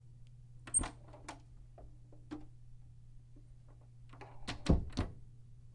Open and close
Bedroom, Wood